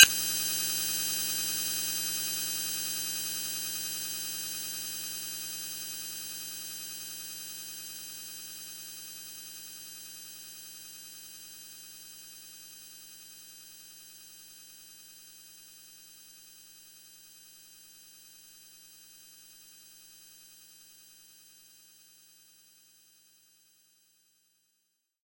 PPG 001 Dissonant Weirdness G#5
This sample is part of the "PPG
MULTISAMPLE 001 Dissonant Weirdness" sample pack. It is an experimental
dissonant chord sound with a lot of internal tension in it, suitable
for experimental music. The sound has a very short attack and a long
release (25 seconds!). At the start of the sound there is a short
impulse sound that stops very quick and changes into a slowly fading
away chord. In the sample pack there are 16 samples evenly spread
across 5 octaves (C1 till C6). The note in the sample name (C, E or G#)
does not indicate the pitch of the sound but the key on my keyboard.
The sound was created on the PPG VSTi. After that normalising and fades where applied within Cubase SX.
dissonant, experimental, multisample, ppg